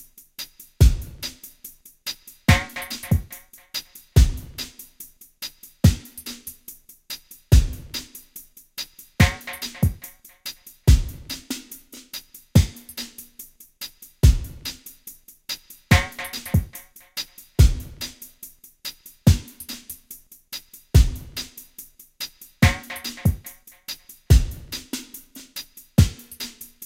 Laba Daba Dub (Drums)
Roots Rasta DuB
DuB, Rasta, Roots